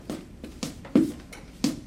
shoes shuffling on the ground
MUS152, shoes, shuffled, shuffles